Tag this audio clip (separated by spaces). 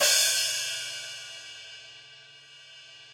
1-shot cymbal hi-hat multisample velocity